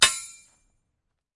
Metal hit with small bar